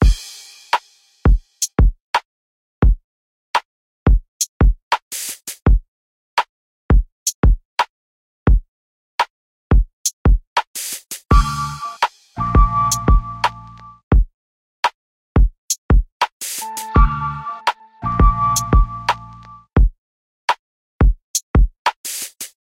a quick simple drum loop I made in Logic Pro X. loops twice, 2nd time is with added synth samples